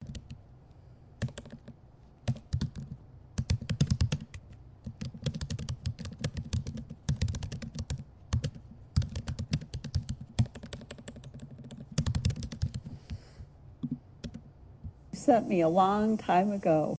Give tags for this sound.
laptop
type
typing

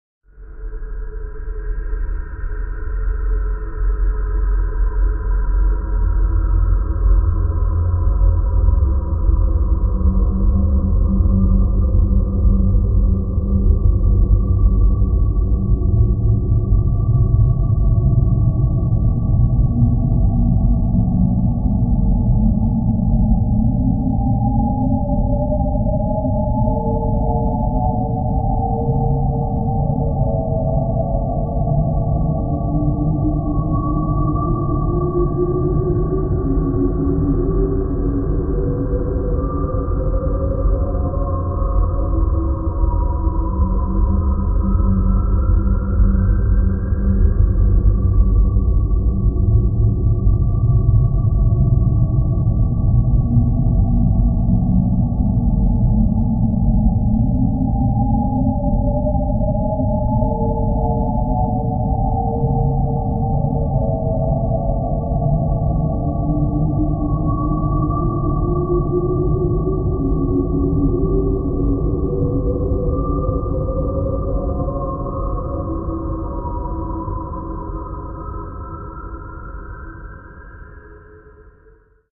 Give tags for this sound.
distant
brown
limbic
reverb
mist
texture
ambient
drone

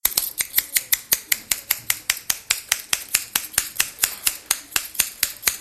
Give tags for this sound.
Binquenais
France
La
Mardoch
my
Rennes
scissors
sound

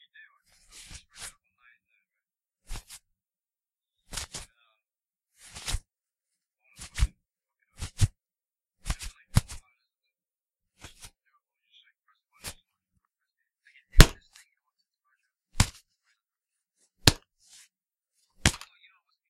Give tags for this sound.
boxing
gloves
grabbing
impact
impacts
punching
skin

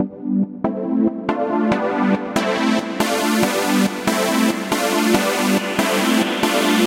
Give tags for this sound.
trance
techno
dance
Cutoff

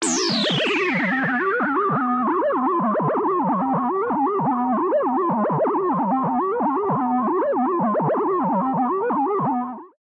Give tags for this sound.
abstract
digital
electromechanics
electronic
future
glitch
machine
mechanical
robotics

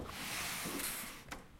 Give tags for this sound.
opening
windows

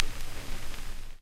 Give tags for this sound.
historical
vintage
response

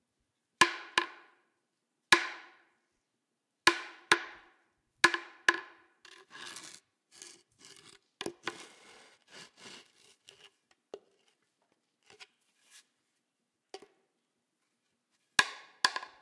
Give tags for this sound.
catacombs
bone
bones

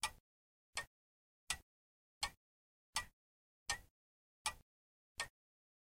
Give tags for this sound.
Clock
tic
ticking
toc

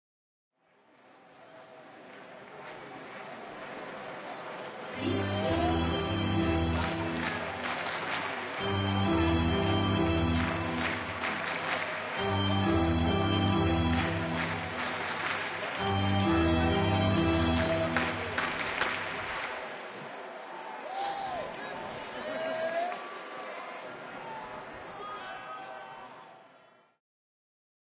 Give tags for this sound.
ballpark
baseball
crowd
field-recording
let
organ
s-go
sports
walla

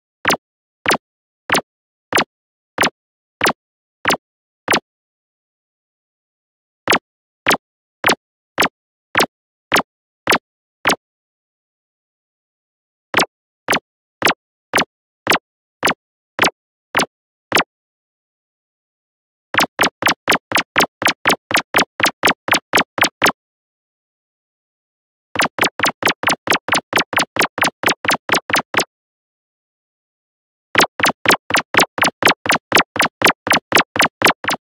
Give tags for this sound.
soft
footstep
little
tony-chopper
videogame
running
footsteps
walk
walking
step
run
cartoon
anime
steps
one-piece
cute

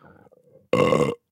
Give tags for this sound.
reaction
male
burp
voice

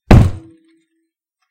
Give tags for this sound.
Corny
Homebrewing
Keg
Homebrew
Set
Drop
Tap
Cornelius

